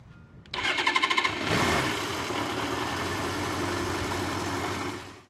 Motorcycle Start Engine
A Yamaha motorcycle being started.
engine, motorcycle, start